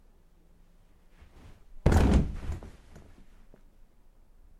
Jumping into bed 5-01.R
jumping into bed